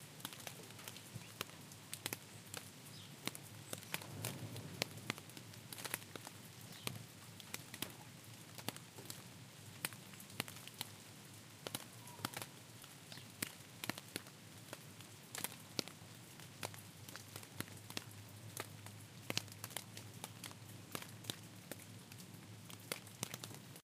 raindrops falling on leaves
Raindrops are falling on raspberry's leaves.
raindrops, rain, garden, enviroment, nature